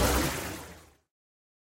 synthesized with the various open source softsynths on linux